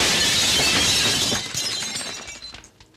A large amount of plate glass smashed and falling on wooden floors
Original recording: "G26-07 Windows Breaking" by Craig Smith, cc-0
glass, shattering, window